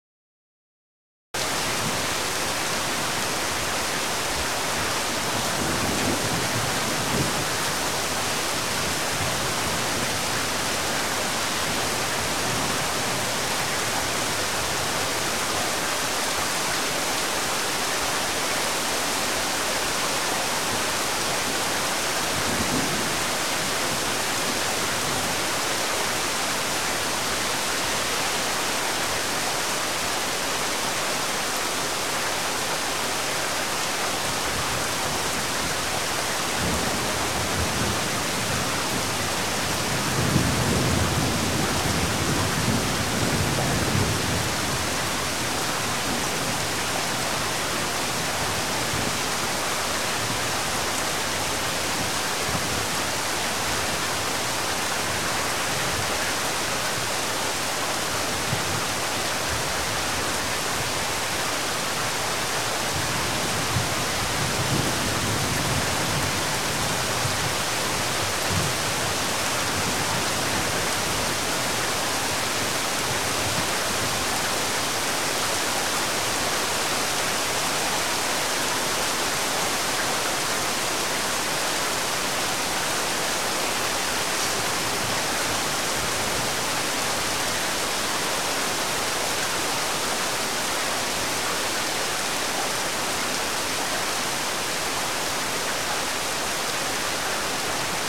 Heavy Rain,
recorded with a AKG C1000S
lightning, wind, strike, storm, thunderstorm, ambience, rainstorm, heavy, field-recording, drip, thunder-storm, weather, raining, nature, water, ambient, rain, shower, rolling-thunder, thunder